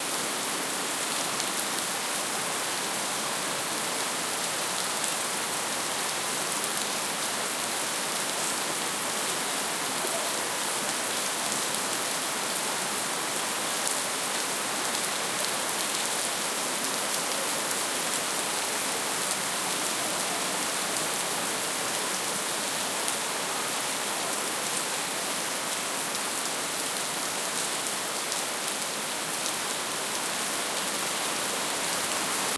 BRUNIER Lucas 2016 2017 pluieloop
I recorded on my balcony the rain in my street. After, on Fl Stduio, i made a loop with automation envelope for keep an constancy.
C’est un groupe nodal continu pouvant être utilisé en loop. Le timbre harmonique est brillant.
Il y’a plusieurs couches de sons. Un bruit en fond continue et devant des bruits scintillants plus impulsifs.
Il n’y a pas vraiment de dynamise dans ce son, son intensité est la même tout le long.
Le son, a été compressé et un équaliseur a été utilisé pour amplifié les hautes fréquences afin de pouvoir mieux ressentir le timbre cassant et croustillant.